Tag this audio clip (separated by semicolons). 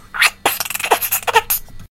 forest; squirrel